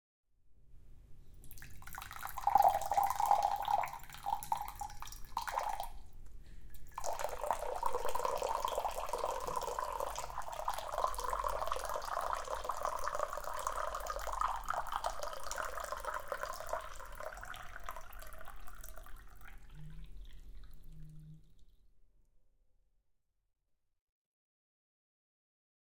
Water filling a glass.
Information about the recording and equipment:
-Location: Living room.
-Type of acoustic environment: Small, diffuse, moderately reflective.
-Distance from sound source to microphones: Approx 0.3m.
-Miking technique: Jecklin disk.
-Microphones: 2 Brüel & Kjaer type 4190 capsules with type 2669L head amplifier.
-Microphone preamps: Modified Brüel & Kjaer type 5935L.
-ADC: Echo Audiofire 4. (line inputs 3 & 4).
-Recorder: Echo Audiofire 4 and Dell D630C running Samplitude 10.
No eq, no reverb, no compression, no fx.
Binaural, Domestics-sounds, field-recording, Fx